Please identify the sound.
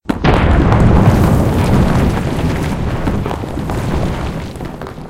Rumbling Rocky sound
rock slide quake sound.
so i made this when making a video as an activity with some friend (nothing big probably not even going to be published publicly) and i decided i would put it up here after using some of the sound from this site.
i used a program called Audacity to edit multiple recordings together to achieve the right effect (in this cause a wall crumbling to the ground) i used a thunder recording as well as rocks hitting the ground some explosion sound and a gravely sound.
i didnt record any of the samples i use myself, here are the samples i used.
i dont believe i broke any copy right, said "You are free To sample, mash-up, or otherwise creatively transform this work"
crush, earth-quake, rock-slide, rocky, rolling, rumble, rumbling, shatter, thunder